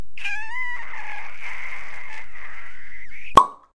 kiss-Pop
International anthem for kiss-ups and ass kissers.
A sound to be played when ever someone is being overly complimentary. or not paying attention at all... This combined sound reminds us to cool it when we are getting too maudlin, or can be used to advise someone else to take their heads out of their a,,es.